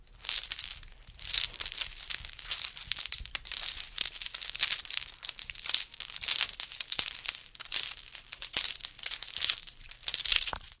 Climbing gear sound
Recording of climbing gear clinking together.
gear, climbing